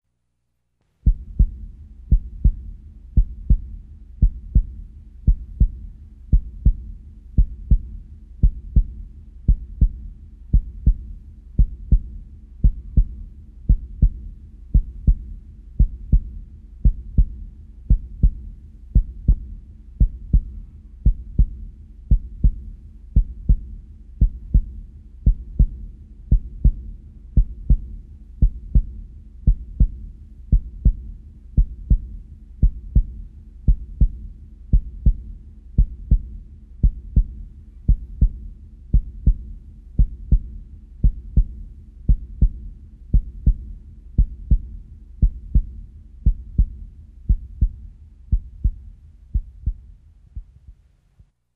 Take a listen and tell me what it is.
Just the sound of a general heart beat. Recorded using a steath-e-scope and a SONY RVJ recorder. Turned out a BEAUT!!
beat, drama, heart, intense